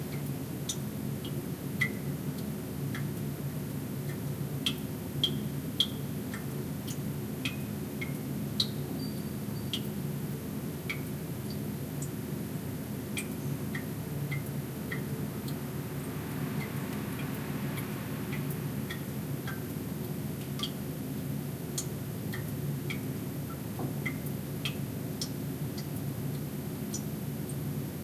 bathroom tone with waterdrop 2
Bathroom from the Sitges Fil Festival 2011's Auditorium.